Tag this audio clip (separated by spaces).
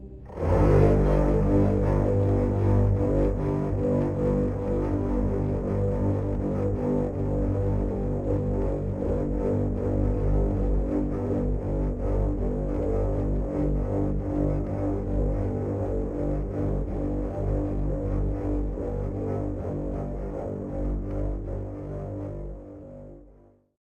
Cello processed aggressive